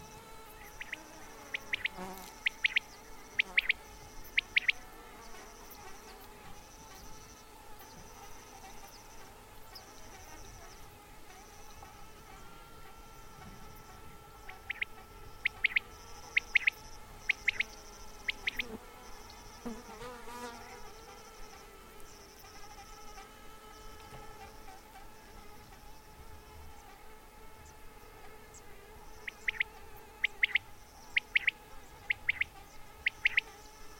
Quail callings, cicadas and Sandwasps in background. Sennheiser ME66 > Shure FP24 > iRiver H120 (rockbox)/ canto de codorniz, con chicharras y Bembix al fondo